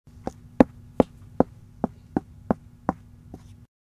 Book Sounds - Knock
Knocking with knuckles on a book